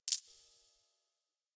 Coin drop 1
Coin being dropped onto other coins with metallic smear and ambience added for subtle 'magical' effect. Might suit use in video game.